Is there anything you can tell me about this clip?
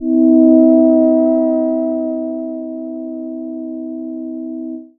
minimoog vibrating D#4
Short Minimoog slowly vibrating pad